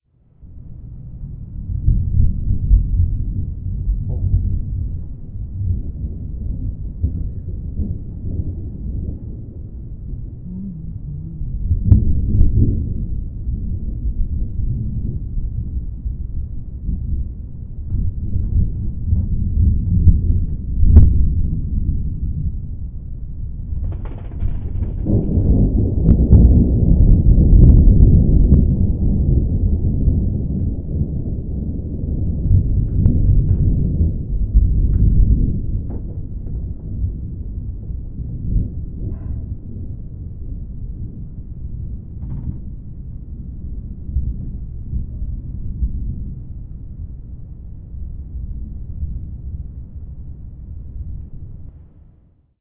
This is one of several segments of a distant thunderstorm the U.S. West Coast experienced very early in the morning (2-3am). I recorded this from Everett, Washington with a Samson C01U USB Studio Condenser; post-processed with Audacity.